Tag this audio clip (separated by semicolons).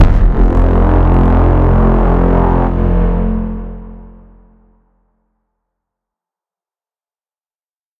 request; trombone; inception